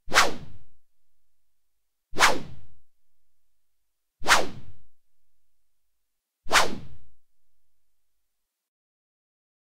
f Synth Whoosh 19
Swing stick whooshes whoosh swoosh
Swing, swoosh, whooshes, whoosh, stick